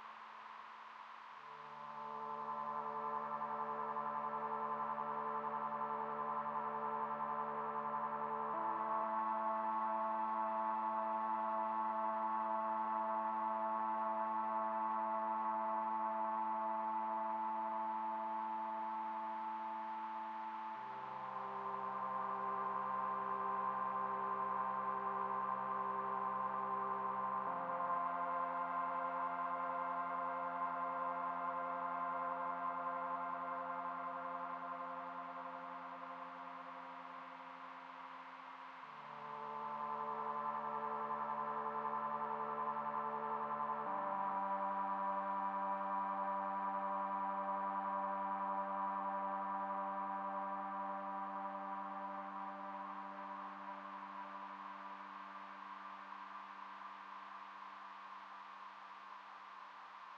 Dark noisy drone
A noisy drone with 'dark'characteristics
pad, atmosphere, synthesizer, synth, noisy, sound, dark, drone